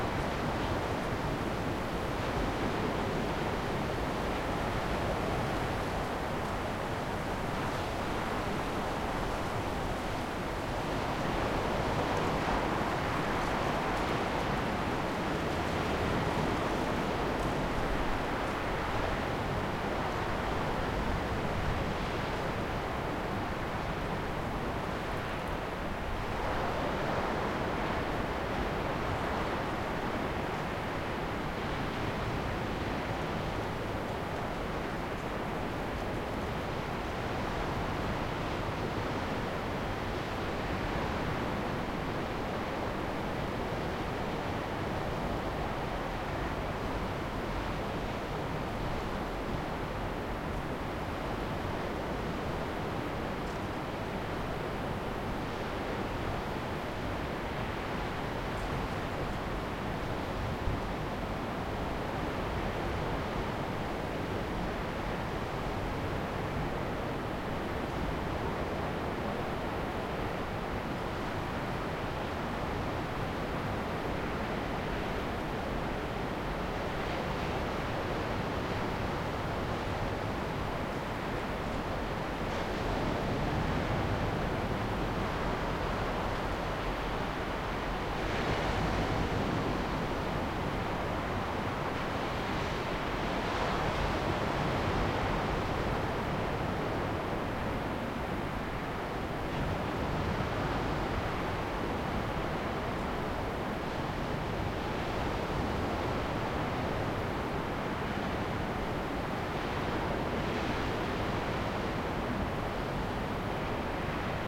4ch-surround, wide angle field recording of the seaside at Warnemünde on the German Baltic Riviera.
Recording was conducted in October 2013 on a hotel balcony approx. 100m away from and 20m above the shoreline.
Recorded with a Zoom H2, these are the FRONT channels, mics set to 90° dispersion.